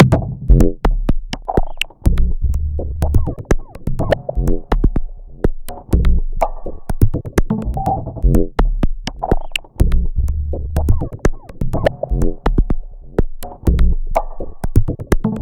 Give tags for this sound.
industrial
loops
machines
minimal
techno

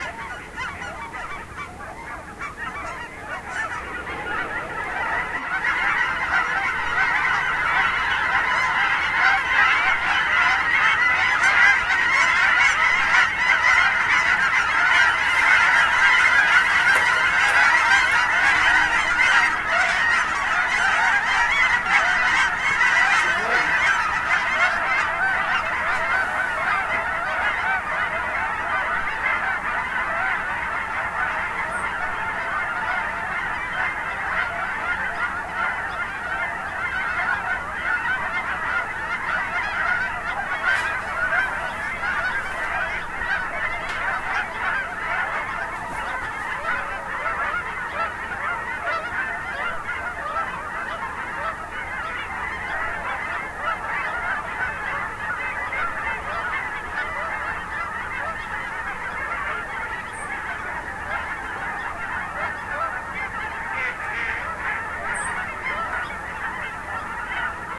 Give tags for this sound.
ambiance
birds
field-recording
geese
nature
water-birds

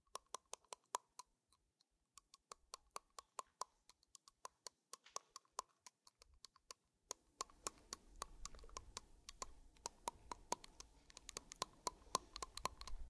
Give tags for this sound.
chattering
noise
clacking
people

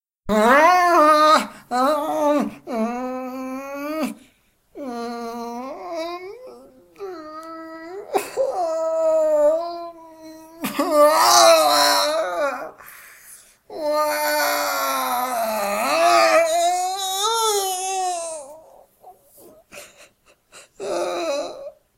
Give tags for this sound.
cry
emotional
male
crying
sadness
sad
agony
tears

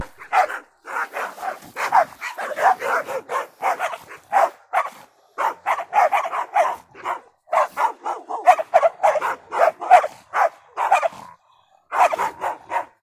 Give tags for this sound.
anger
angry
animal
animals
bark
barking
barks
big
dog
dogs
field-recording
fight
fox
growl
growling
howl
pet
pets
snarl
wolf
wolves
woof

